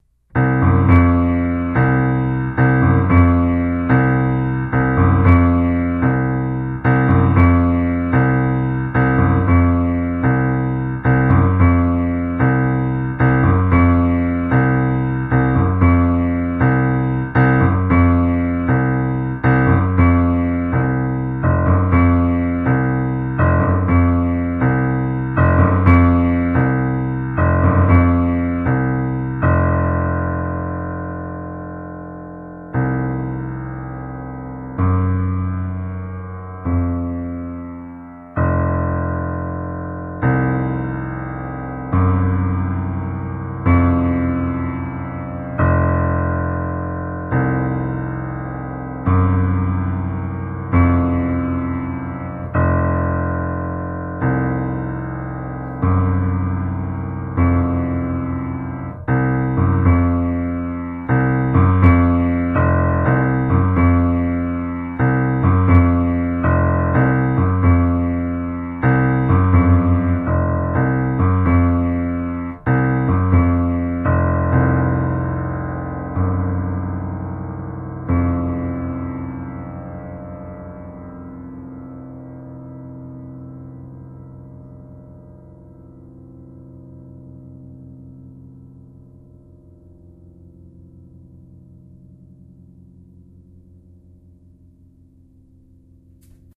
Piano sound
Fooling around on the piano. Yamaha baby grand.
piano, field-recording